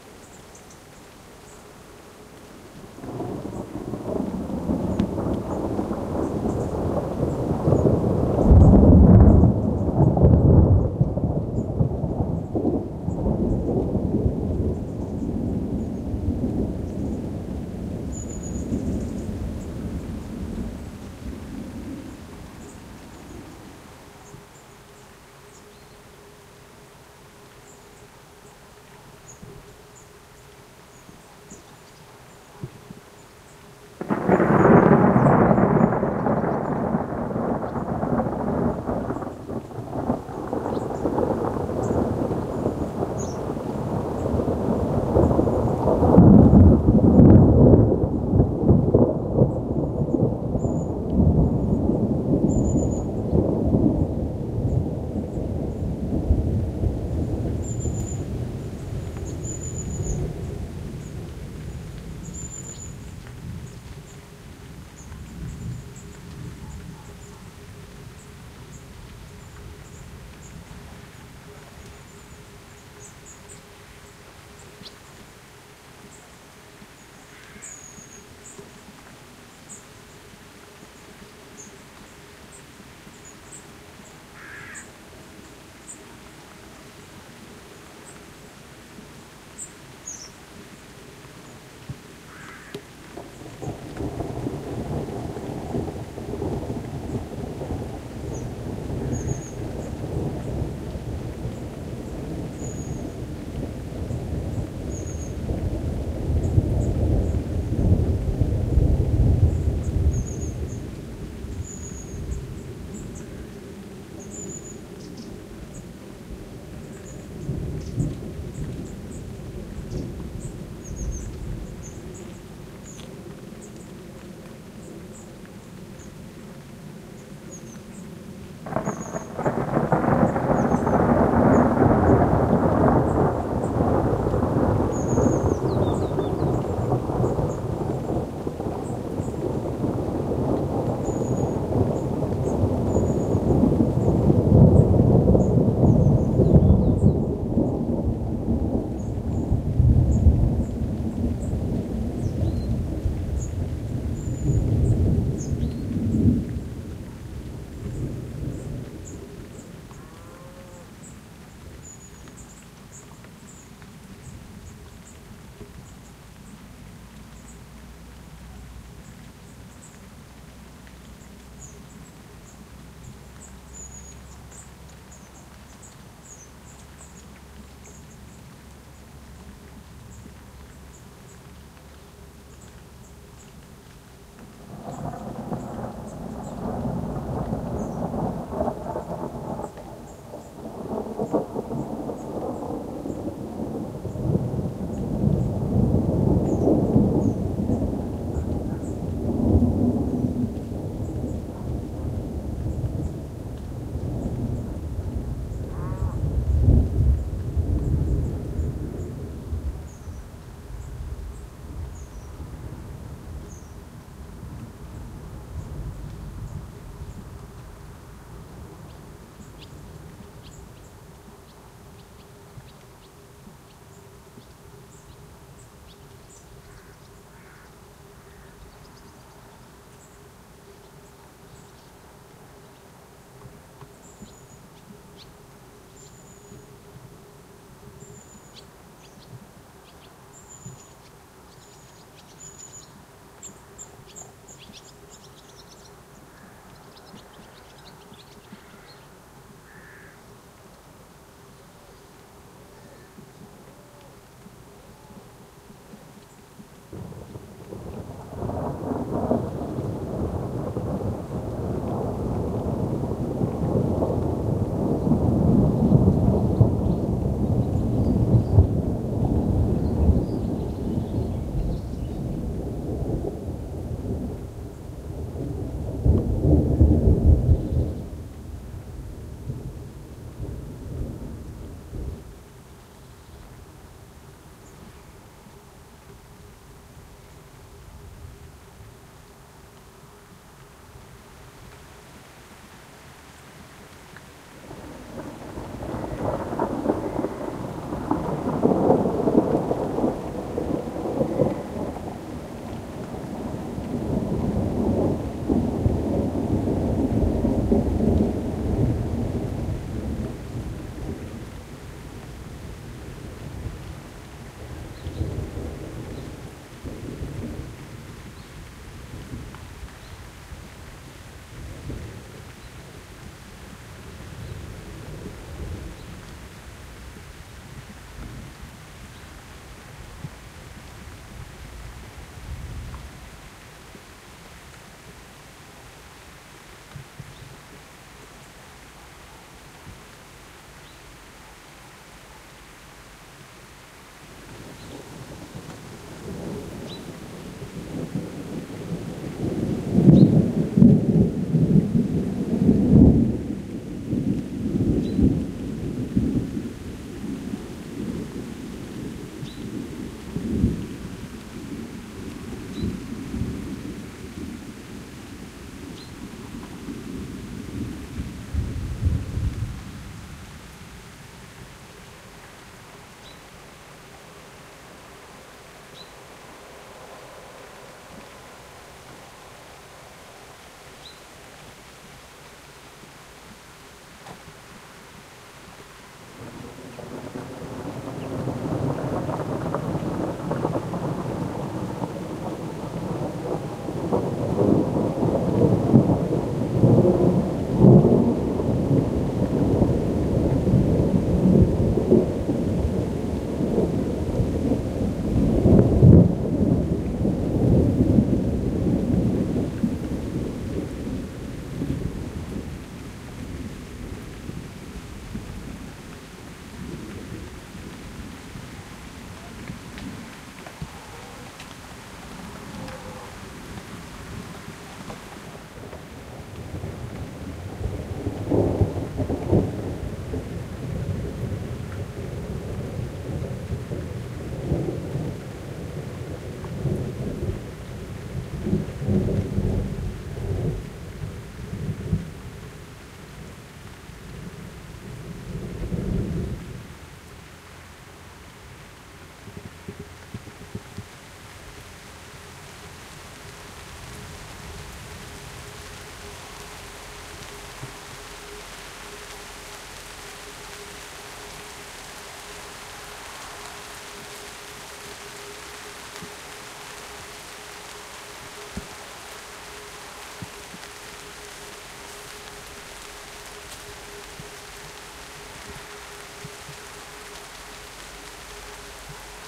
A fairly strong summer thunderstorm, recorded with a Rode Stereo Videomic pro plugged into a camcorder.
The lightning bolt in the photo can be heard at -05:50 on the recording.
Thunderstorm, Rain, Storm, Weather, Loud, Thunder, Lightning